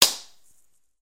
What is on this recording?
Sound of a toy gun;
Microphone: Huawei Honour U8860 (Smartphone);
Recorder-App: miidio Recorder;
File-Size: 28.5 KB;
gun, pistol, shot, Toy